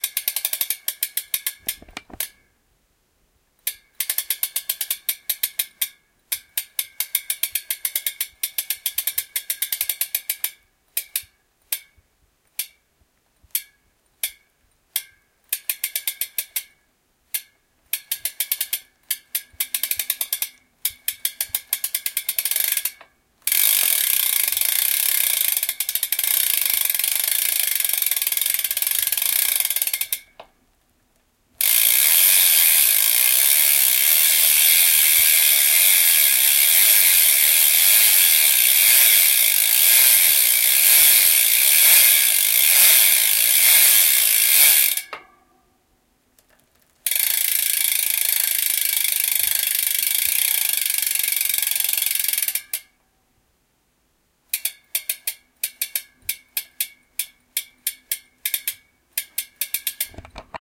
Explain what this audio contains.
Race bicycle back wheel gear rims
a racing bicycle. i liked the sound of the gear rim thingy
racingbike, bicyclesound